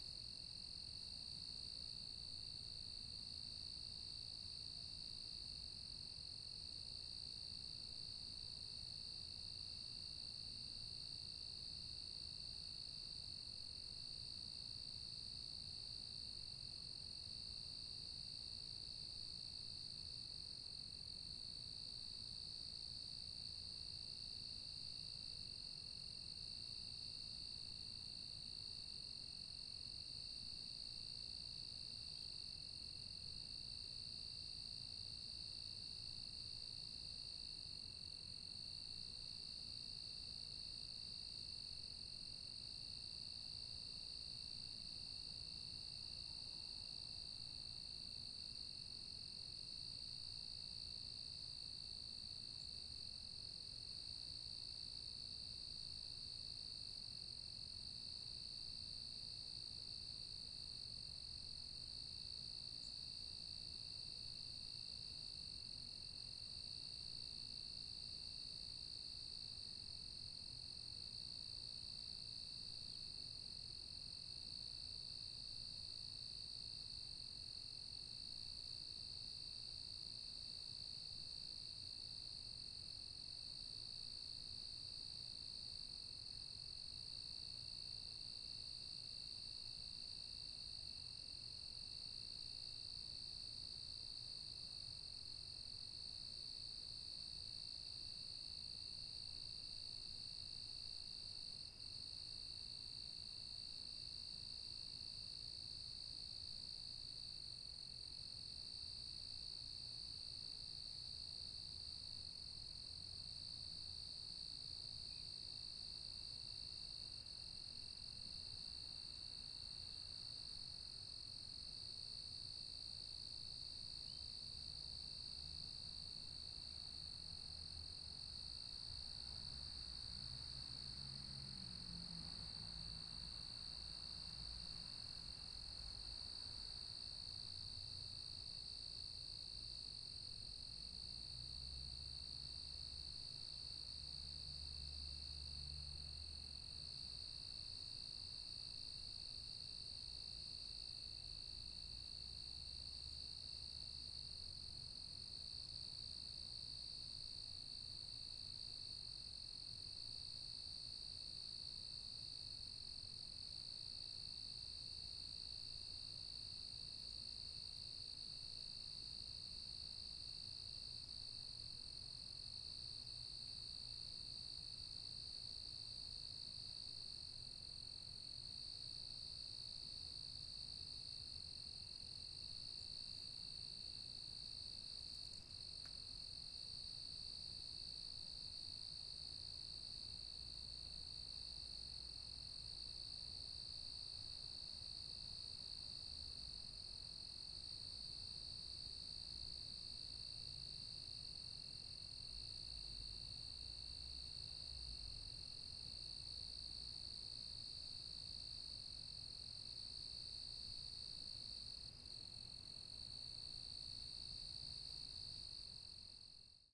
HUDSON sept crickets CLOSE traffic BG 2 F
front pair of 4 channel recording on H2. light distant traffic.
4-channel, crickets, exterior-ambience, fall, september